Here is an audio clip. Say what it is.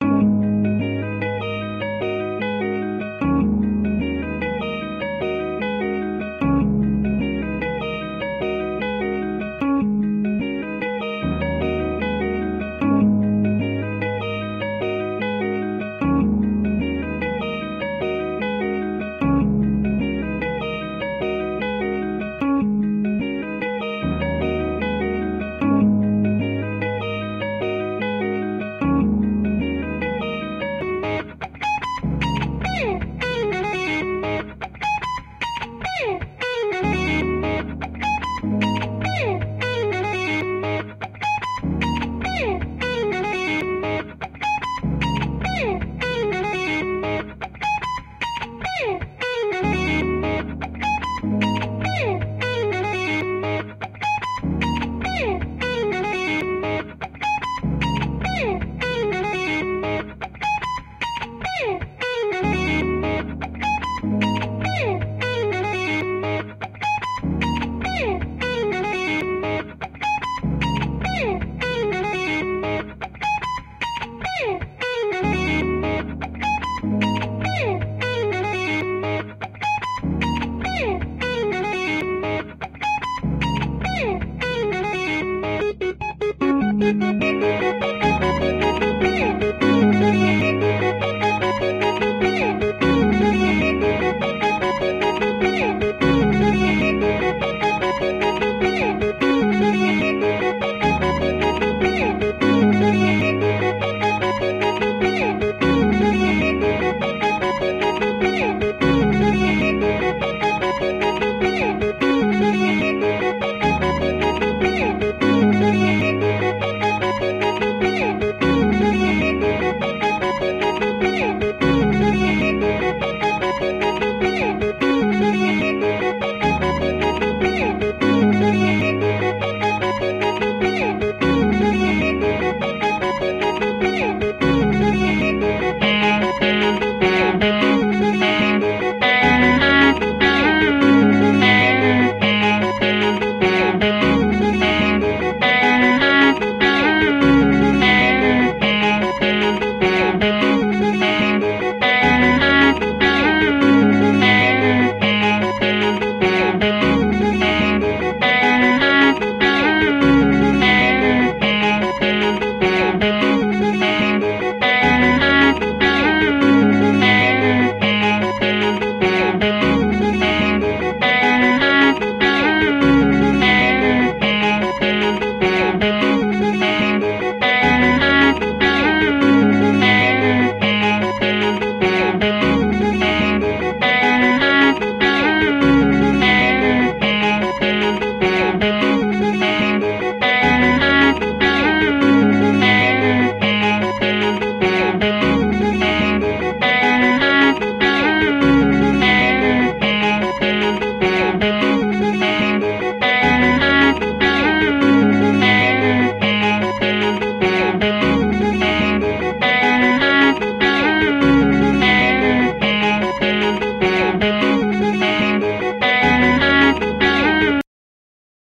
Guitar and Piano Music
Free, Guitar, Loop, Music, Piano, Sound